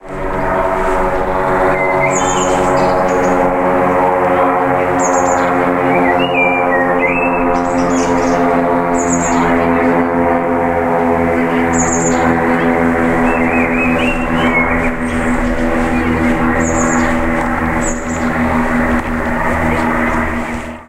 overhead pair of planes Dublin
a couple of light plane if I recall correctly Im not a plane anorak I cannot even start to identify They flew nearby visible from our back garden and made this lovely drone
avaiation, Field-recording